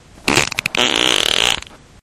double trouble fart 2
flatulence, flatulation, fart